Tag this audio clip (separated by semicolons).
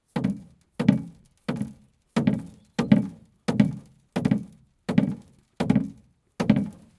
Friction; Crash; Metal; Tool; Hit; Impact; Smash; Steel; Bang; Plastic; Boom; Tools